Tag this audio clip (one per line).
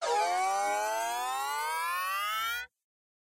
Rise
Sawtooth
Soar